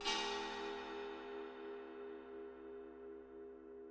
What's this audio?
China cymbal scraped.